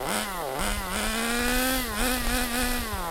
Buggy small engine throttles